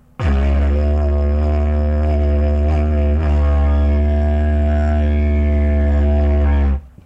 Didg Drone 8
Sounds from a Didgeridoo
Didgeridoo, Indigenous, woodwind